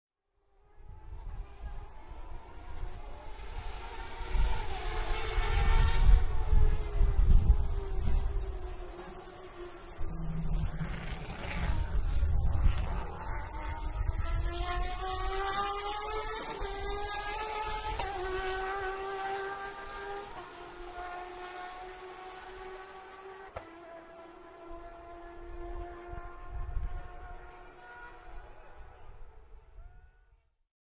TC.Balcarce08.1turn.1
TC Race at Balcarce, Argentina. Car came down-shifting to 1rst turn, engine exploding , and go accelerating to back-straight. Recorded with ZoomH4, LowGain
car, engine, explode, field, race, recording, zoomh4